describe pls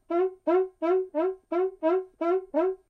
Different examples of a samba batucada instrument, making typical sqeaking sounds. Marantz PMD 671, OKM binaural or Vivanco EM35.
brazil, drum, groove, pattern, percussion, rhythm, samba